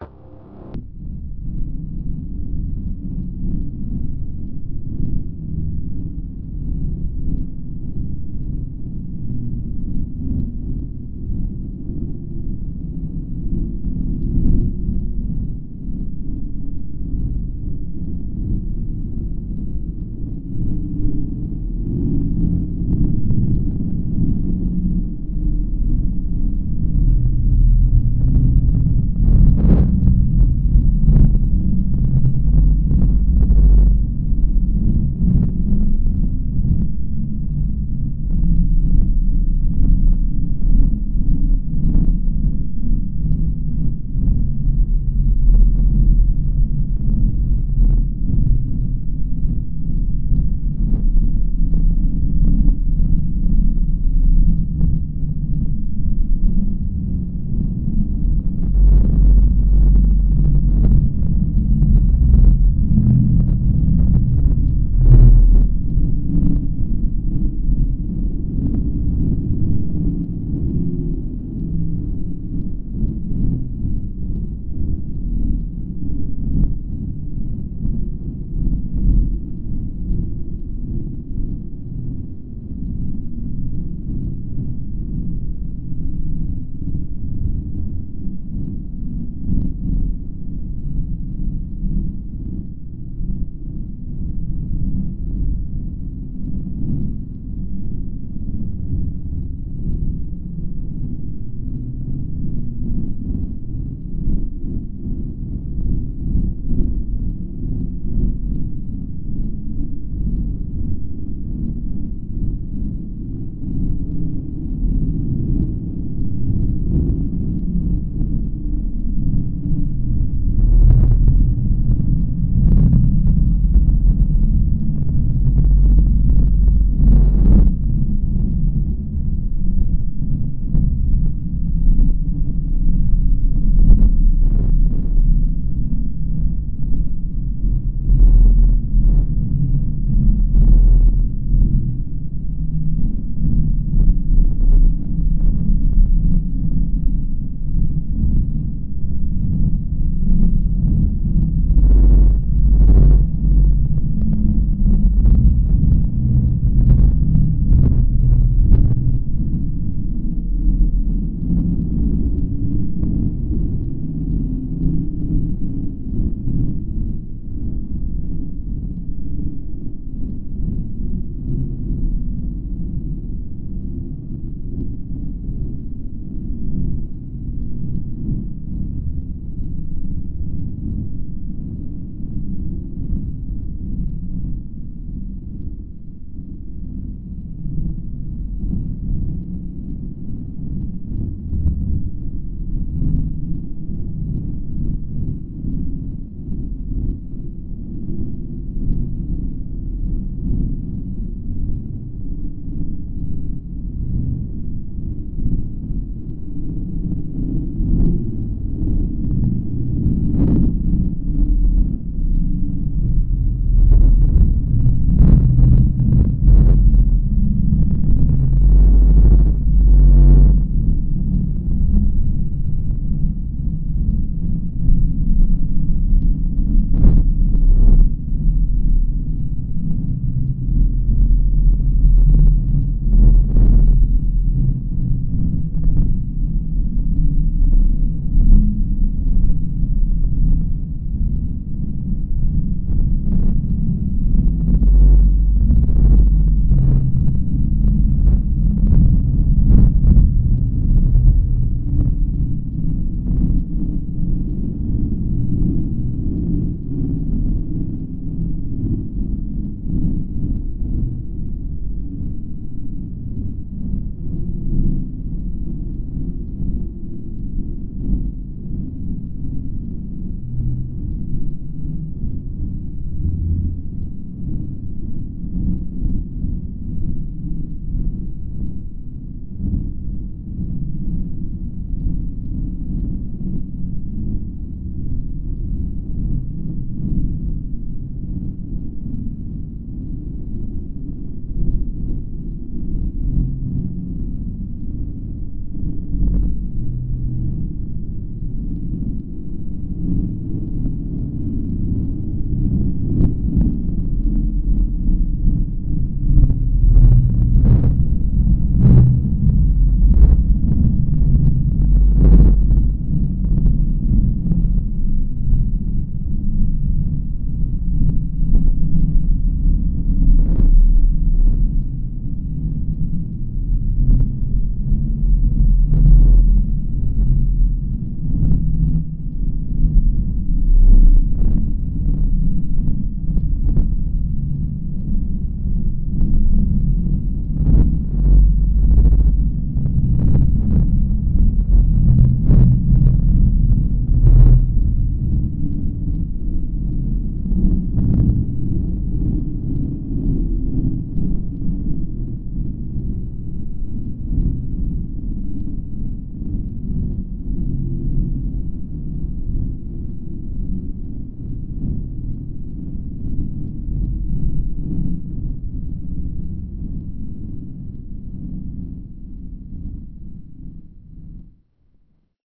A pulsating softly bass sound depicting a UFO-like sound. This sound was created from manipulated waveform generated sounds. However, if you decide to use this in a movie, video or podcast send me a note, thx.
bass, eerie, generated, otherworldly, soft, sound, space, ufo, ufo-sound, waveform, weird